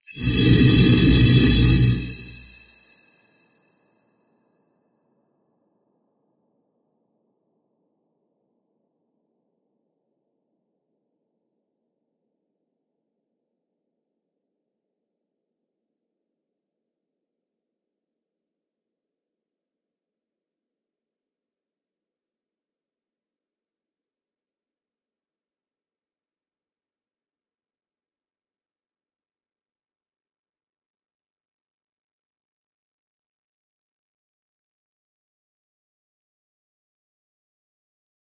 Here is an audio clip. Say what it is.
upliftmid#1, granular, soundhack, phasevocoding, time-stretched, maxmsp, abletonlive
abletonlive, granular, maxmsp, phasevocoding, soundhack, time-stretched, upliftmid1